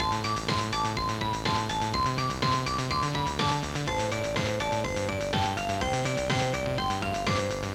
Cass 011 A Aurora1.2 Loop 02
While looking through my old tapes I found some music I made on my Amiga computer around 1998/99.
This tape is now 14 or 15 years old. Some of the music on it was made even earlier. All the music in this cassette was made by me using Amiga's Med or OctaMed programs.
Recording system: not sure. Most likely Grundig CC 430-2
Medium: Sony UX chorme cassette 90 min
Playing back system: LG LX-U561
digital recording: direct input from the stereo headphone port into a Zoom H1 recorder.
cassette, Amiga, collab-2, tape